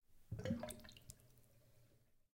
Sink BLOP sound
Blop sound of bathroom sink after draining water out.
Water, Sink, Drain, Field-recording